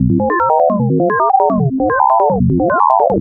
Loop of eight sine impulsions with sweep effects between the notes, repeated four times. Obtained with max/msp.
loop, max, ring, sweep, msp, glitch, sine, portamento, modulation, stereo